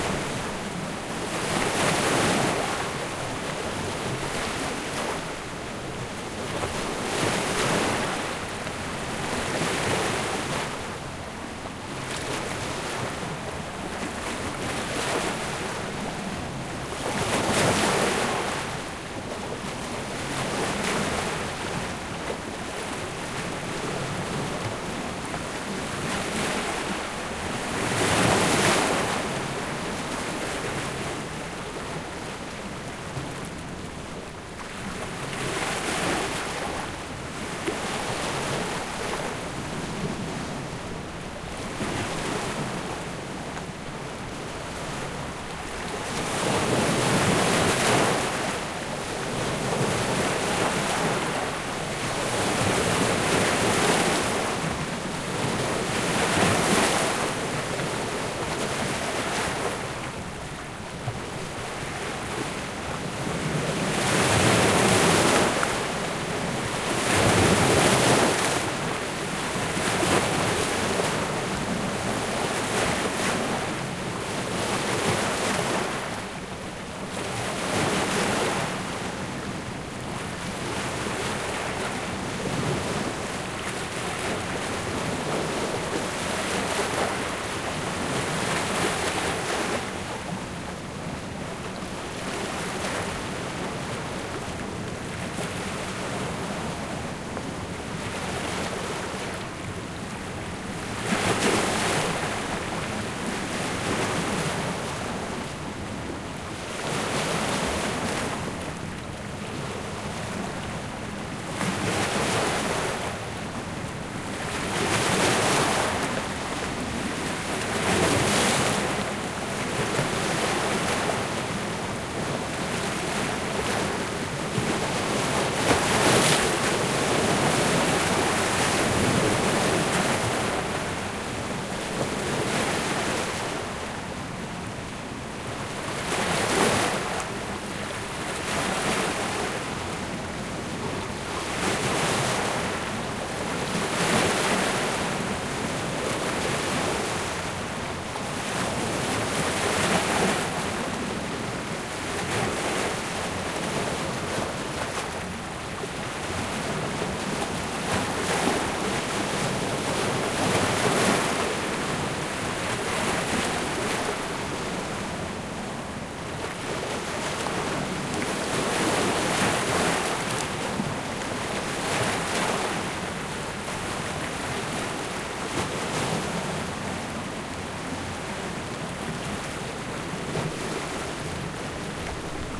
Water - Stones
That's how water and stones in Gdynia's breakwater sounds like. Zoom H4n internal mics. See it on the map, close your eyes, and you're there :)
sea, waves, coast, rocks, shore, water, beach, stones, seaside, poland, gdynia